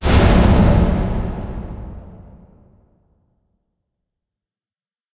A more spine-tingling sound intended to make audiences cringe more intensively at something.
jump, low, wince, startle, cringe, horror, scare, emphasis, stinger, hit